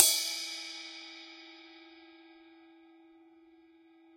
CC17-ZAMThn-Bl~v04
A 1-shot sample taken of a 17-inch diameter Zildjian Medium Thin Crash cymbal, recorded with an MXL 603 close-mic and two Peavey electret condenser microphones in an XY pair. The cymbal has a hairline crack beneath the bell region, which mostly only affects the sound when the edge is crashed at high velocities. The files are all 200,000 samples in length, and crossfade-looped with the loop range [150,000...199,999]. Just enable looping, set the sample player's sustain parameter to 0% and use the decay and/or release parameter to fade the cymbal out to taste.
Notes for samples in this pack:
Playing style:
Bl = Bell Strike
Bw = Bow Strike
Ed = Edge Strike
1-shot, velocity, multisample, cymbal